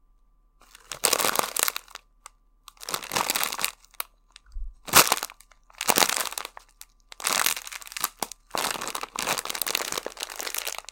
Crisps Pickup
Just me picking up a packet of crisps
items, food, equip